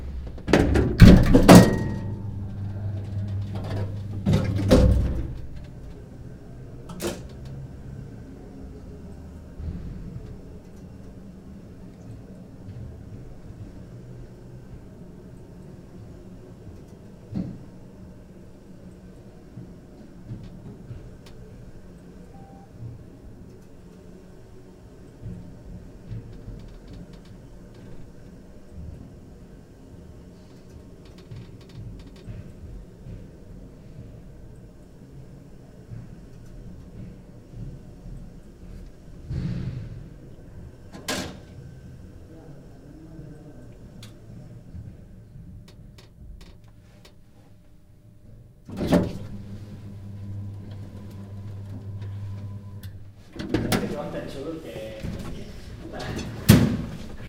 in an elevator with occasional neighbour neighbourhood noises and jabbering
elevator, neighbour, noises, neighbourhood, jabbering